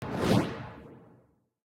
RAYO LASER
disparo, rayo, laser